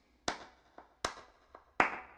One clap processed